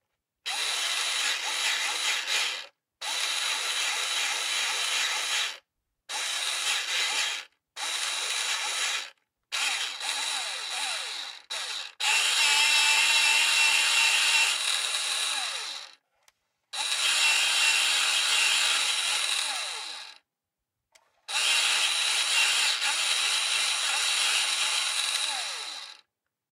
Running an electric pencil sharpener. Mono recording from shotgun mic and solid state recorder.
pencil, electric-pencil-sharpener